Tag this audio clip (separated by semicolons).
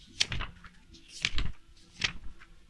hojas; libro; pasar